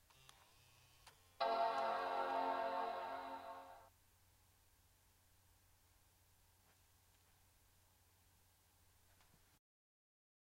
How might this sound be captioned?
2011 Macbook turning on
Recordists Peter Brucker / recorded 4/21/2018 / shotgun microphone / 2011 MacBook turning on